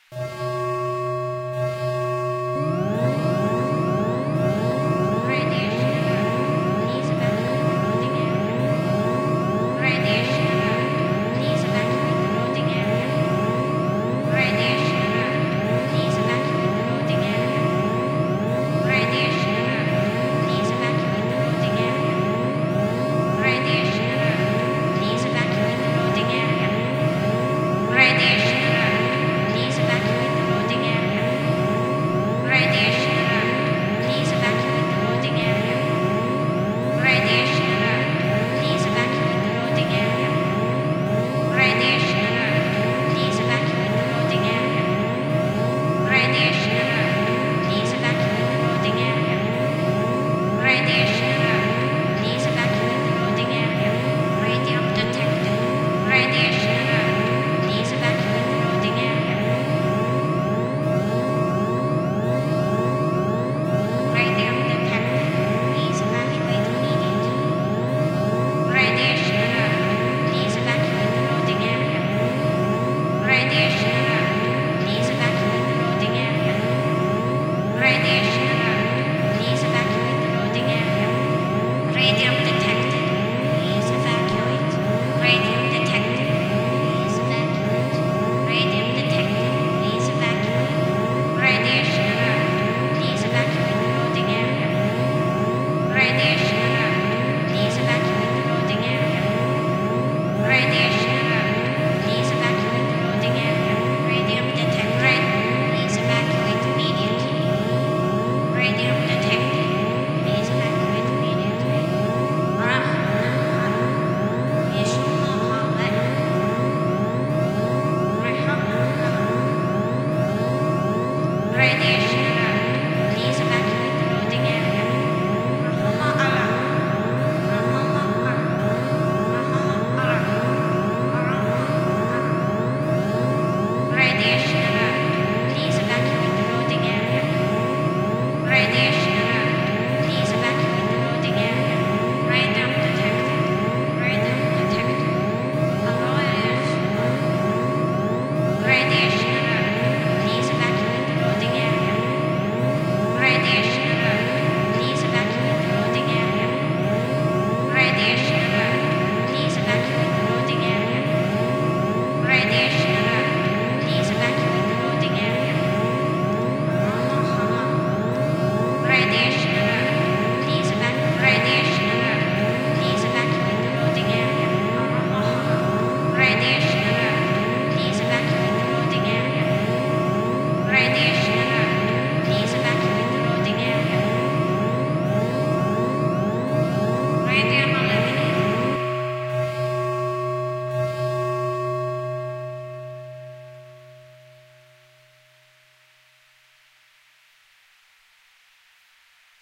A radiation alert, most likely from a foreign country. Made in FL Studio. It was made to be the sound of a radiation alert in a factory of some sort.
distress, alarm, warning